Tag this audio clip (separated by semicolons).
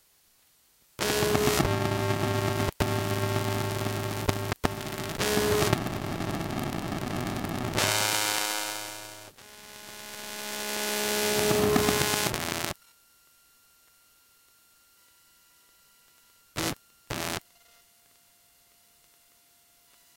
mic; coil; emf